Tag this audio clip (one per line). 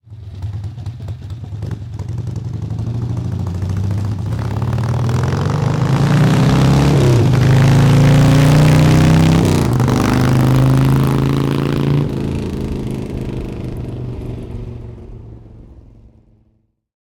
1974
Belgium
Harley-Davidson
Motorbike
Motorcycle
XLCH